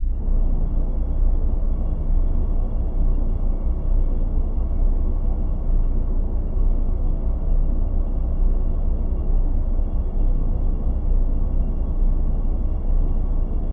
Granualized synth's, cello's and violins